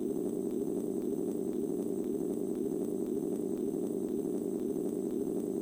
Just an easy rocket boost sound
missle, missle-boost, rocket-boost